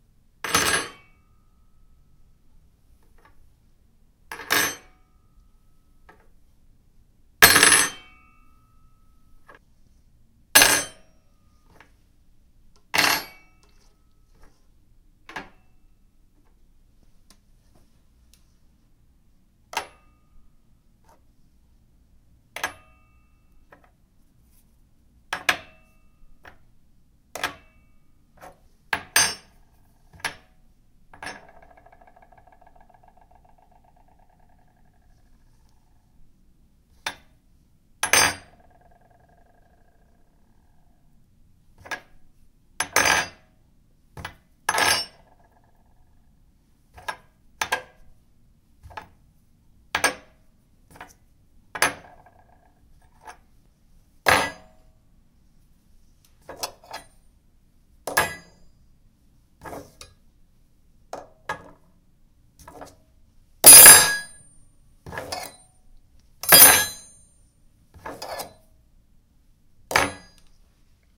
Individually putting a fork, spoon and knife onto the counter. Can you hear when they switch?